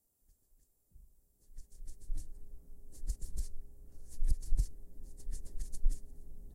Scratching of an arm with fingernails.